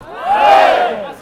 Recorded in Plaza mayor in Madrid, Spain
male, voice, vocal, group, human, shout